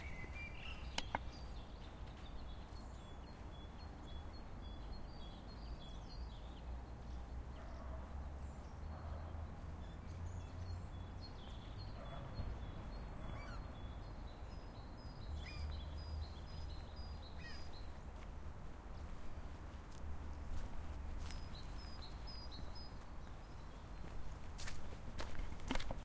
Birds, spring. Dog barking in the distance. Person walking by.